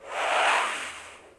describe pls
saltar mover volar
mover, volar